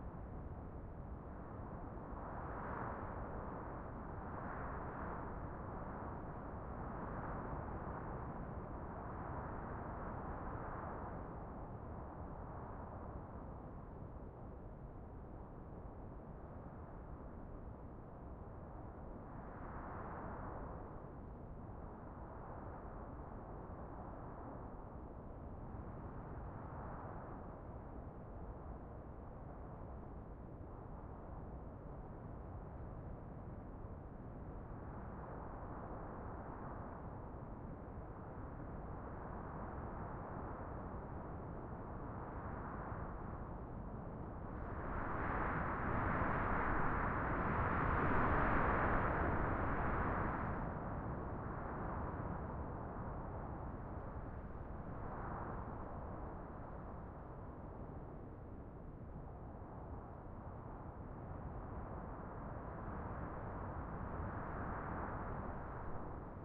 wind synthetic good distant variable gusty
gusty, good, distant, wind, synthetic